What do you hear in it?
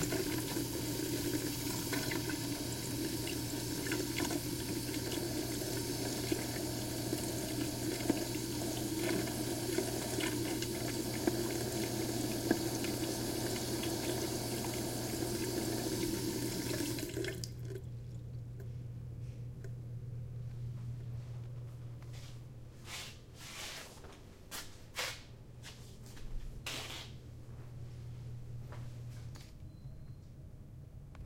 A running faucet 3
Running water from a bathroom faucet, recorded from the bottom of the sink near the drain.
Stereo Recoding
bathroom, faucet, water